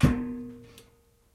Bass hit on hallow metal object
ding, hit, metal, ring, ting, tone